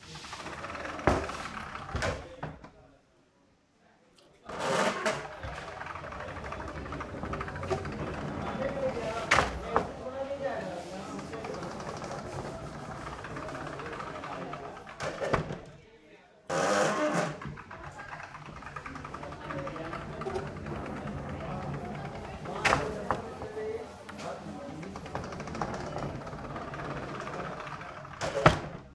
Hospital Sliding Door Open & Close with general ambiance v1
Recording of Hospital Sliding Door with general ambiance.
Recorded with Rode NTG 2 mic, recorder used Zoom h6